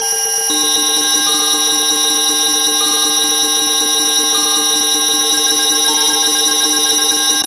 part of pattern I created in soundtracker (ft2 clone)